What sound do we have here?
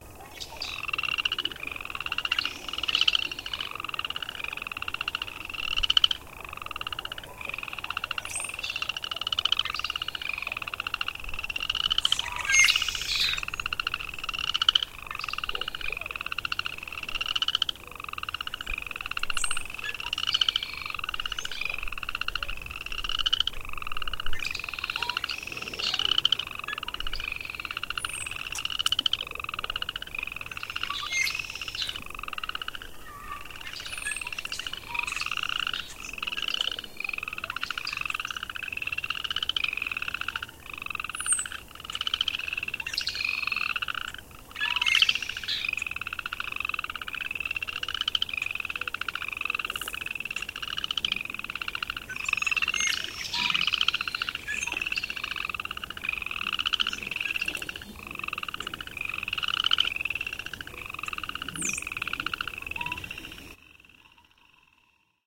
pond frog bird cleaned
Stereo recording by pond with loud frogs and audible birds. This is a denoised version of the other file I uploaded with a similar name.
frog, croak, frogs, pond, bird, nature, birds, field-recording, water